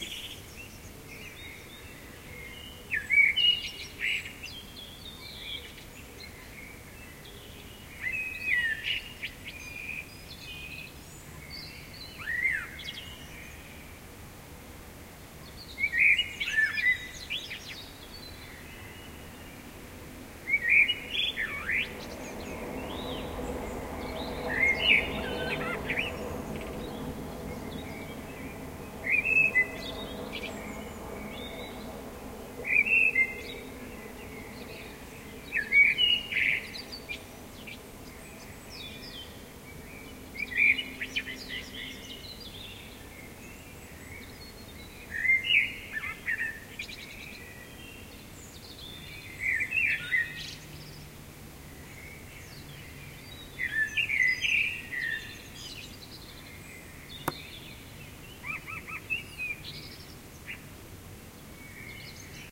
Early Bird after clock change - winter to summer 2017
First birds after clock change. Recorded in Kiel-Gaarden 2017